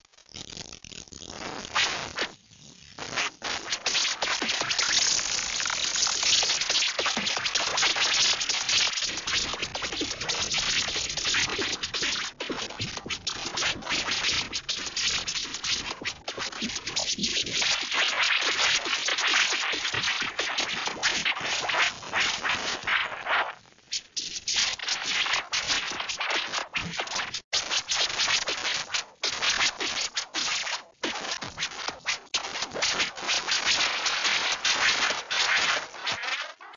big bug bent
bending, bug, circuit